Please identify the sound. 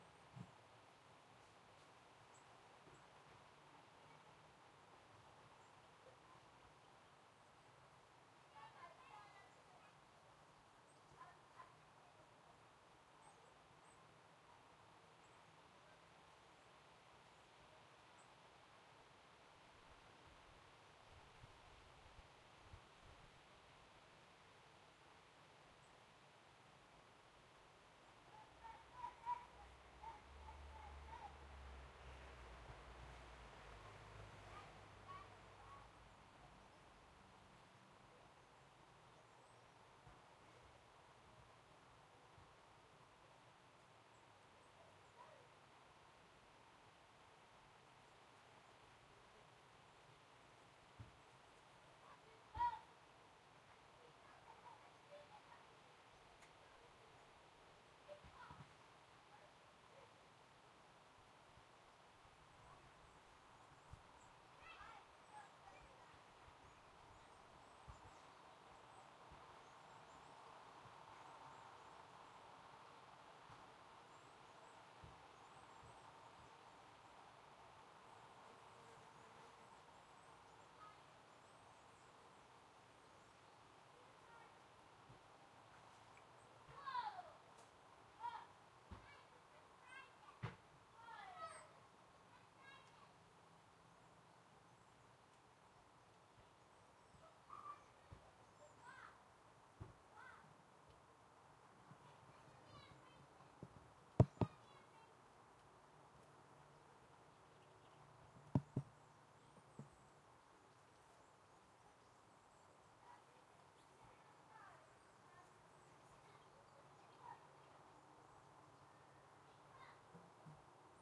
Abience from a garden in a quiet street. Children playing a ball game in the distance and a car passes.